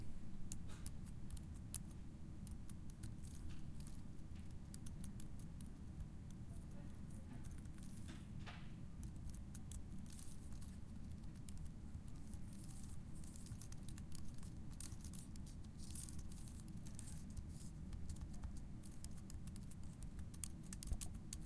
Typing Slow
Slow, macbook, typing